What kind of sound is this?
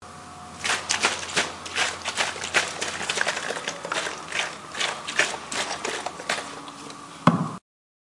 MySounds GWAEtoy Water bottle
field, TCR